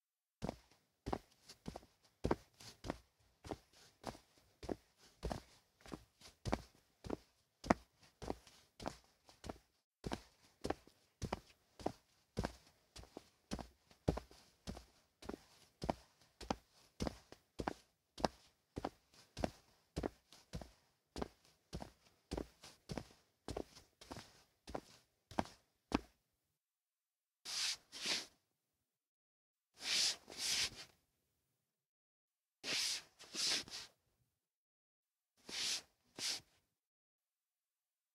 footsteps socks wood
Foley footsteps, socks on wood.
Beyer M110-> ULN-2.
no-noise, foot, steps, walking, wooden